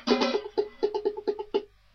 Live Loop 003 122.93bpm
For these recordings we setup various empty cookie cans and we played drummers using 2 pens or little sticks.
Recorded with a webmic.
Joana also choose the name for these sessions and aptly called it "The Bing Bang Bong Band"
Sample of one of the sections where me and Joana played together. I choose one of the 'best played' parts and made it loopable.
Recorded with a webmic on 6 Dec 2011.
child, fun, percussion, play